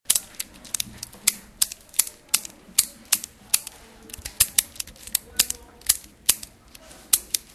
mySound LBFR serhat
Sounds from objects that are beloved to the participant pupils at La Binquenais the secondary school, Rennes. The source of the sounds has to be guessed.
cityrings, France, LaBinquenais, mySound, pencil, Rennes, Serhat